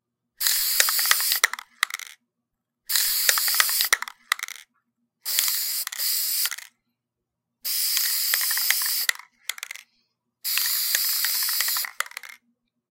I saw there was no real spray paint sounds other than short bursts and can shakes, so I made a few clips. Enjoy!